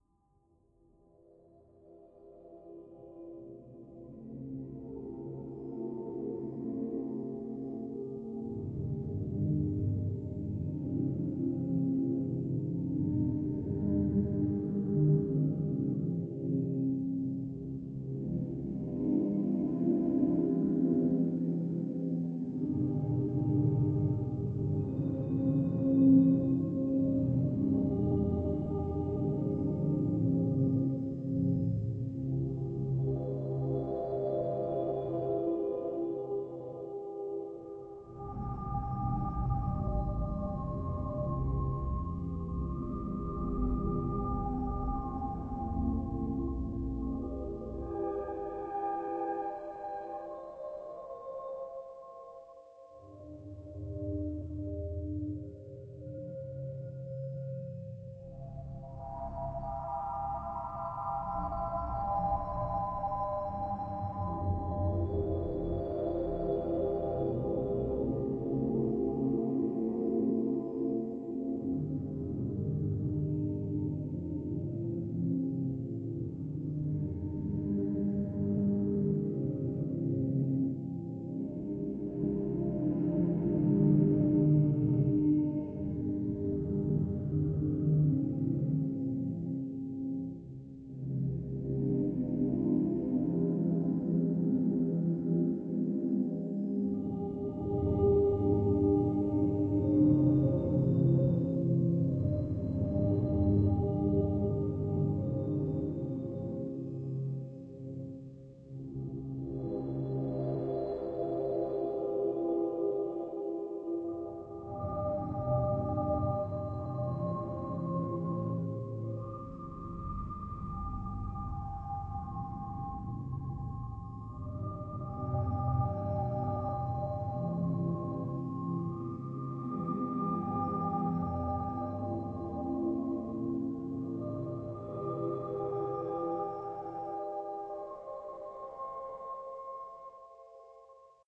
Choral-style non-vocal lament. Ideal for wistful interlude or transition backgroung soundscape
Ambience, Choral, Chorus, Cinematic, Consoling, Film, Free, Hymn, Lament, Meditation, Mindful, Mournful, Movie, Soundscape, Spiritual, Wistful, Yearning